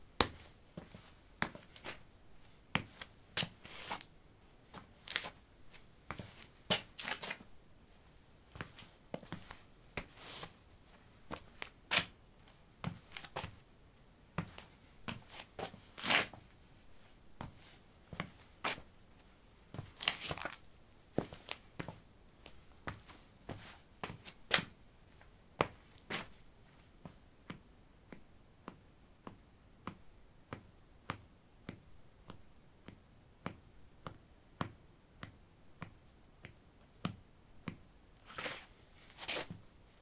Shuffling on concrete: ballerinas. Recorded with a ZOOM H2 in a basement of a house, normalized with Audacity.